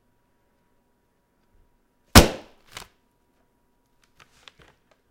popping paper bag
For whatever reason, I decided to blow into a paper bag and pop it in my apartment. May be of some use to the mentally ill.
Recorded with a Rode NTG-2 shotgun microphone and a M-Audio MobilePre USB audio interface.
how, sack, pop, bag, annoy, neighbors, loud, fast-food, popping, paper